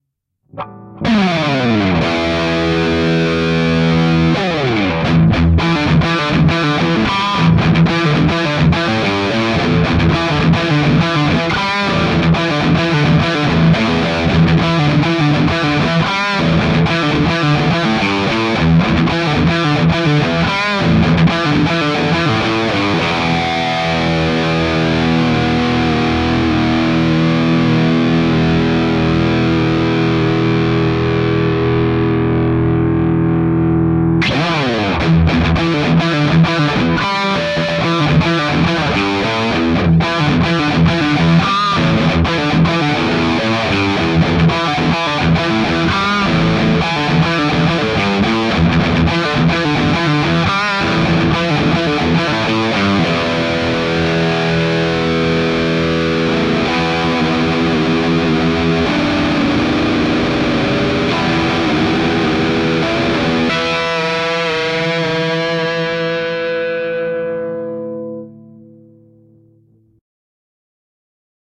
Heavy rock type of riff with the flange cranked and kicking into the hammer ons. Probably good for a beginning or transition when you need something heavy but not super heavy. Think arena rock in a way.